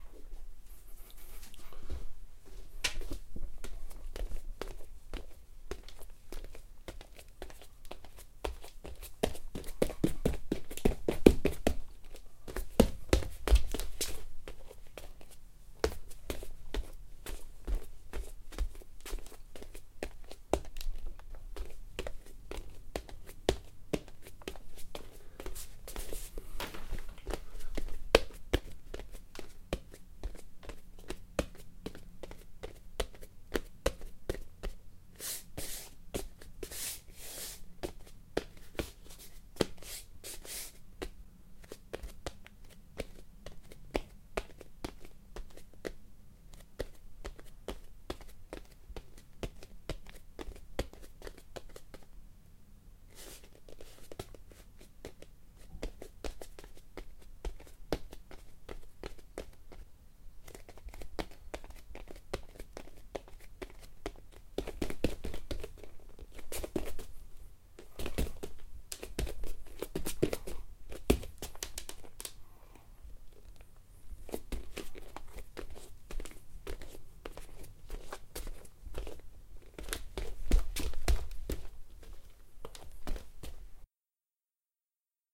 Barefoot walking footsteps wooden floor
walking around barefoot
barefoot, footsteps, steps, walking, wood, wooden-floor